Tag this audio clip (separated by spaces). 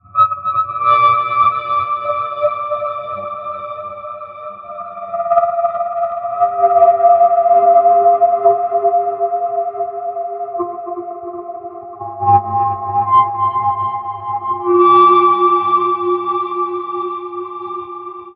dilation effect experimental high-pitched sci-fi sfx sound spacey sweetener time trippy